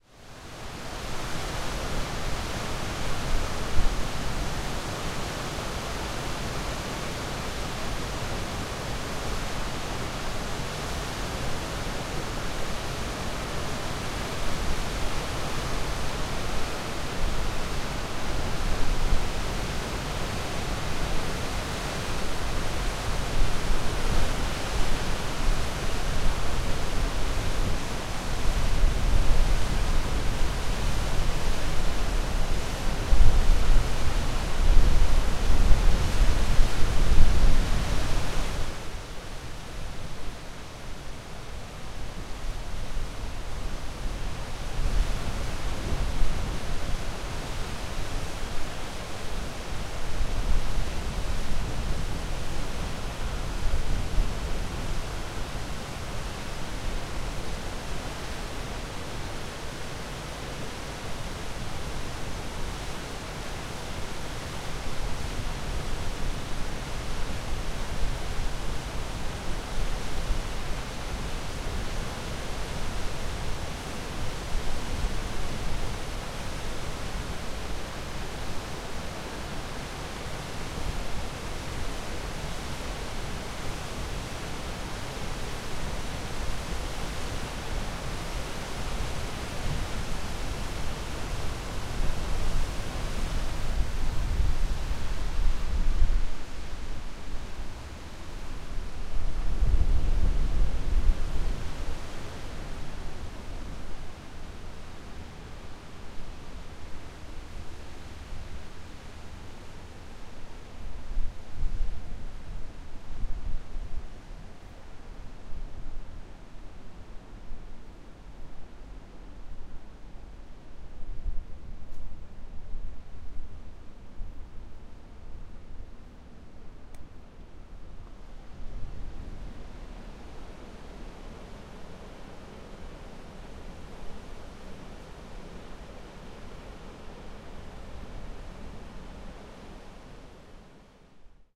Þingvellir waterfall mellow 1
sound of waterfall in Þingvellir, iceland
iceland, ingvellir, waterfall